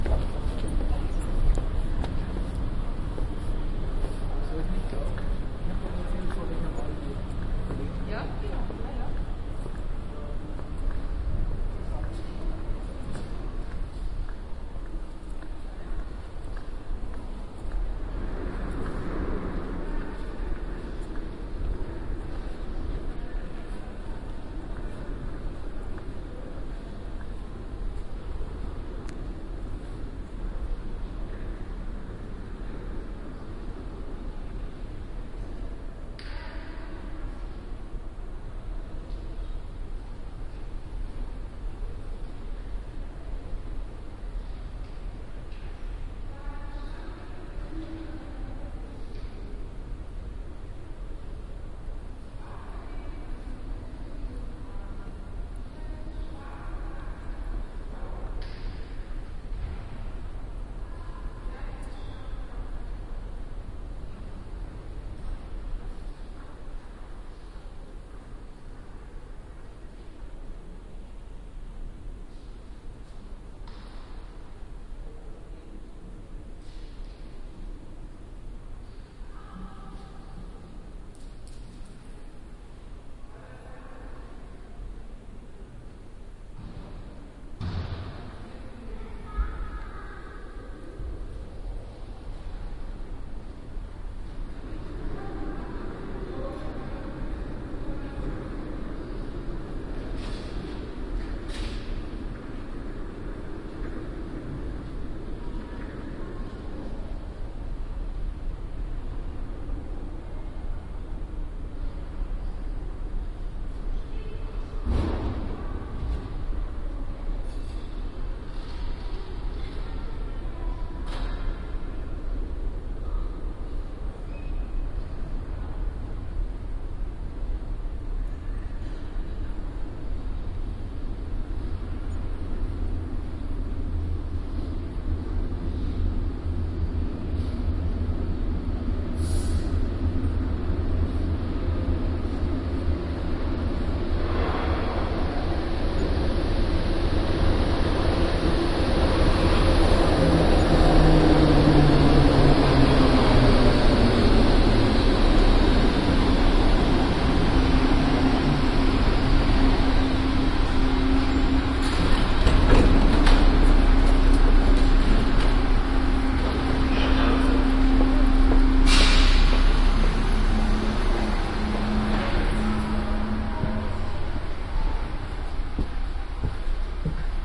Binaural recording. Used in-ear microphones. It's the ambient sound of a subway station platform. A train is entering the station at the end of the recording. Doors open.